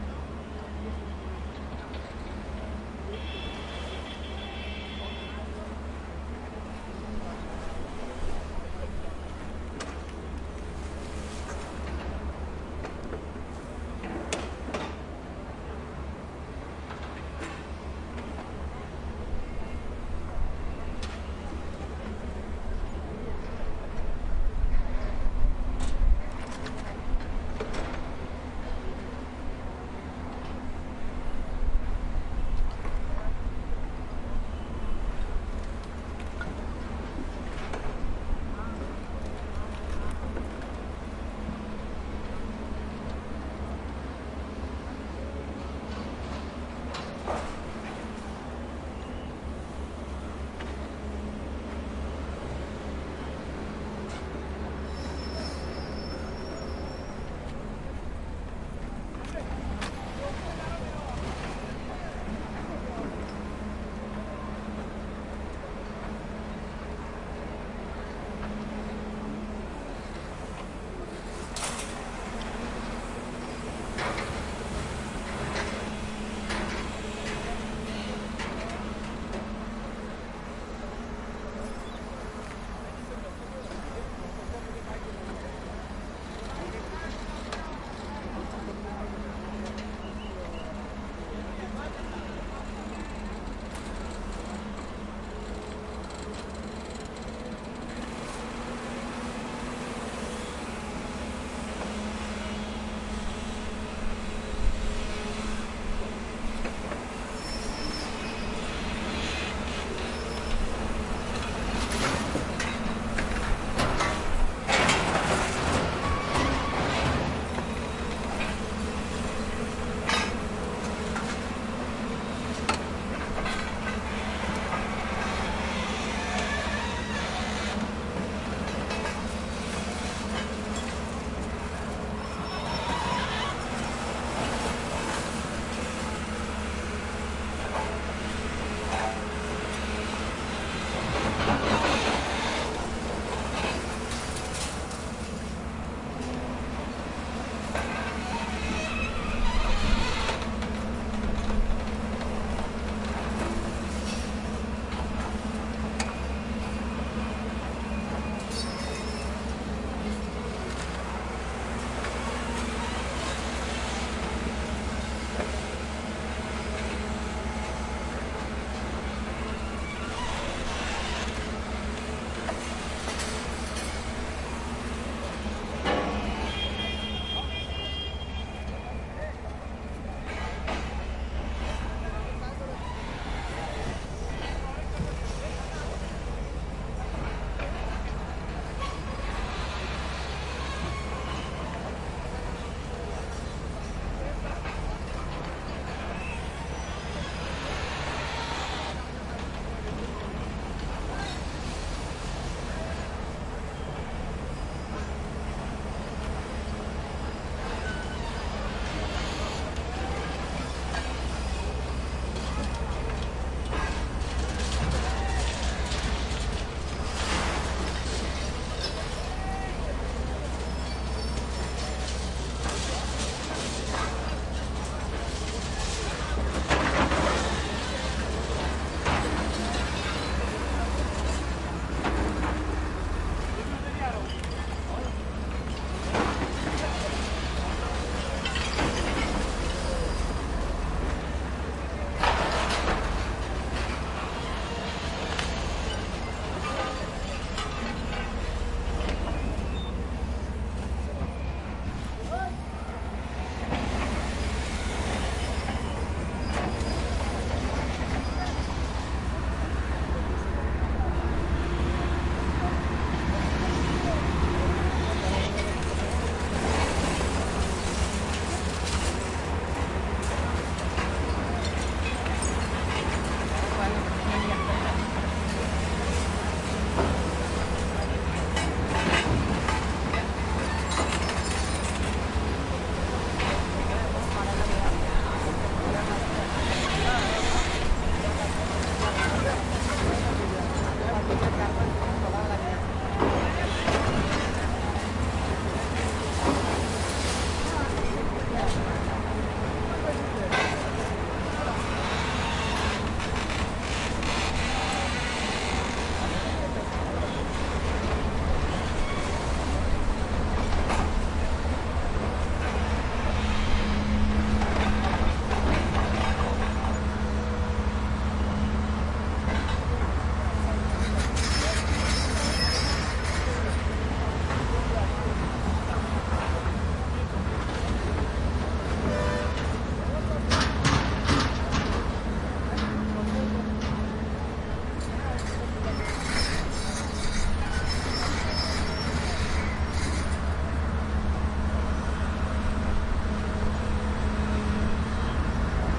demolition site machines diggers tearing buildings apart broken glass metal concrete debris rubble rumble slight echo and crowd1 India
India, apart, broken, buildings, concrete, crowd, debris, demolition, diggers, glass, machines, metal, rubble, rumble, site, tearing